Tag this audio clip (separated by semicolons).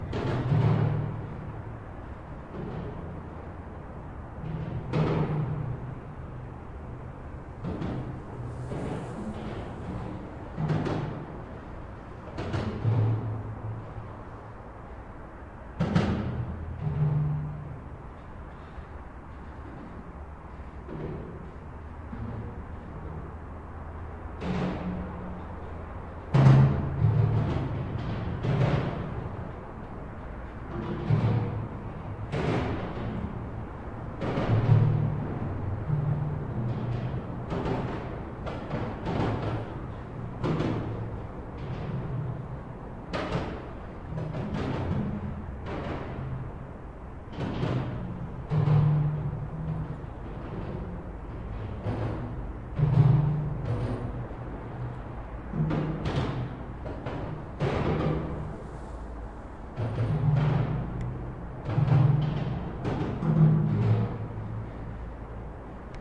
2012; Omsk; Russia; atmo; atmosphere; bridge; cars; noise; roar; rumble